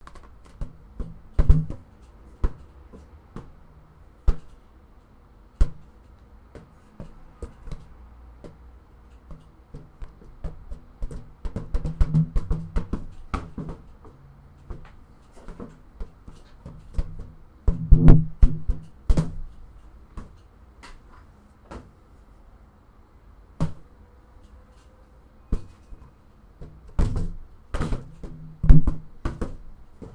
balloon batting 01
swatting a balloon
balloon, bat, play, swat